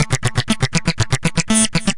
Some more loops made with freeware synth and drum machines and cool edit. File name indicates tempo.